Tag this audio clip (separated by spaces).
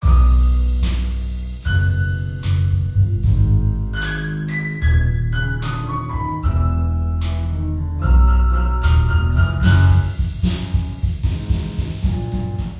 videogamemusic game Jazz music jazzy